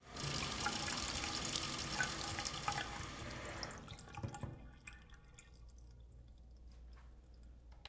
sink drain3
Water down the drain, take 3.